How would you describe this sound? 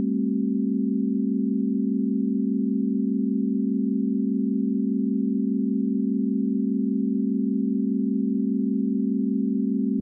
base+0o--4-chord--08--CDFB--100-100-100-30
test signal chord pythagorean ratio
pythagorean, chord, test, signal, ratio